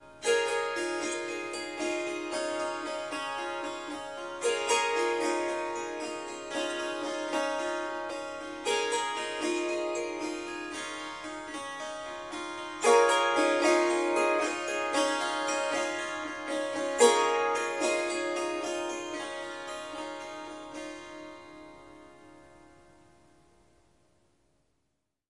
Harp Melody 8
Melodic Snippets from recordings of me playing the Swar SanGam. This wonderful instrument is a combination of the Swarmandal and the Tanpura. 15 harp strings and 4 Drone/Bass strings.
In these recordings I am only using the Swarmandal (Harp) part.
It is tuned to C sharp, but I have dropped the fourth note (F sharp) out of the scale.
There are four packs with lots of recordings in them; strums, plucks, short improvisations.
"Short melodic statements" are 1-2 bars. "Riffs" are 2-4 bars. "Melodies" are about 30 seconds and "Runs and Flutters" is experimenting with running up and down the strings. There is recording of tuning up the Swarmandal in the melodies pack.
Melodic, Swar-samgam, Harp, Surmandal, Swarmandal